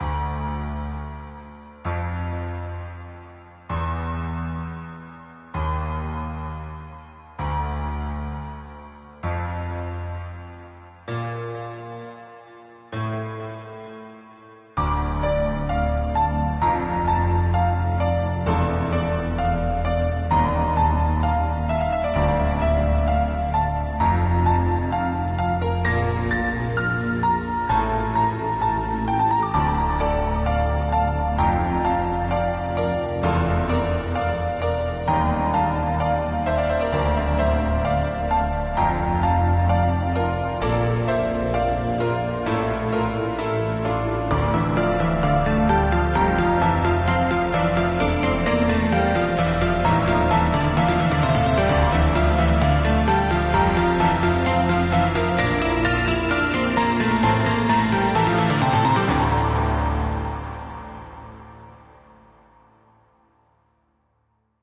Eerie Piano Intro & Buildup (Without Rev-Crash)
but without the reverse crash for a more smooth ending.
spooky, intro, scary, sound, piano, eerie, buildup, mystical, creepy, moody, custom, melodic